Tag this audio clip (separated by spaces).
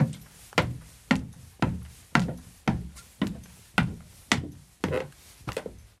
footstep steps footsteps walking wood wooden wooden-steps boat